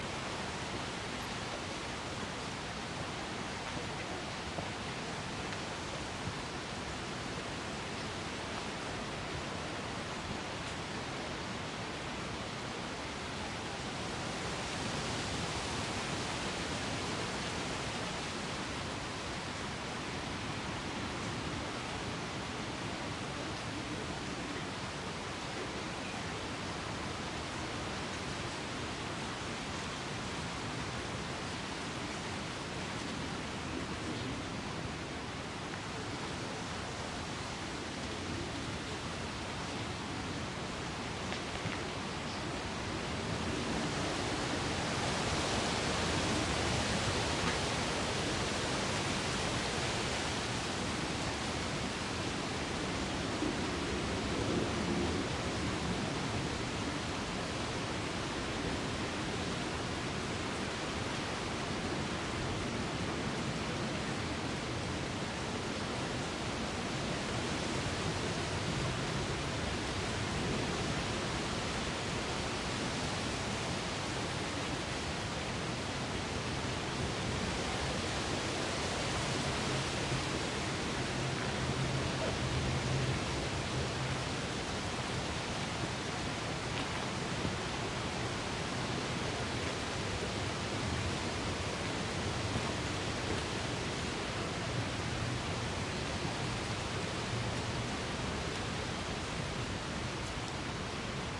20090430.walnut.tree.night
murmur of wind on the crown of a large walnut tree. Sennheiser MKH60 + MKH30 into Shure FP24 preamp, Edirol R09 recorder. Decoded to mid-side stereo with free Voxengo VST plugin. Recorded during the night at Villa Maria, near Carcabuey (S Spain)